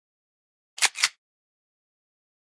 Gun Cocking Sound
Sound of a gun cocking. Thanks for the downloads, folks!
projectile, explosive, airsoft, shotgun, handgun, soldier, ammo, weapons, fire, gunfire, load, warfare, ammunition, pistol, magazine, guns, military